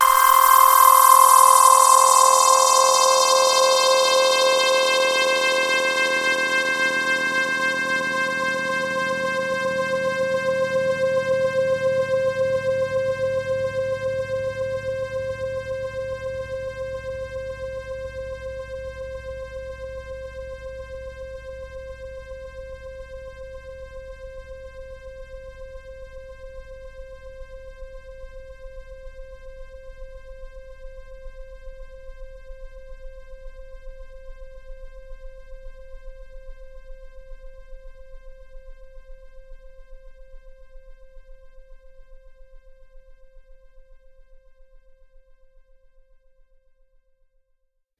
Q Saw filter sweep - C4
This is a saw wave sound from my Q Rack hardware synth with a long filter sweep imposed on it. The sound is on the key in the name of the file. It is part of the "Q multi 003: saw filter sweep" sample pack.